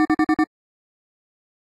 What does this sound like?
5 beeps. Model 3